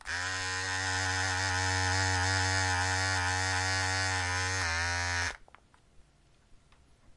The Sound of a Hair Trimmer .